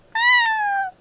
The sound of a cat meowing